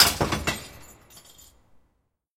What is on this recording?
Glass Drop 3
Throwing away glass trash.